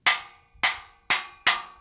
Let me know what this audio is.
Metallic Tapping
Bang, Boom, Crash, Friction, Hit, Impact, Metal, Plastic, Smash, Steel, Tool, Tools